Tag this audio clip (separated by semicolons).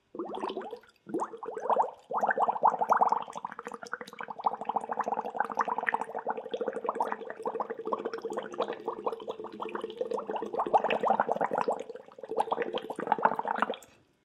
bubble; bubbling; liquid; splashing; straw; water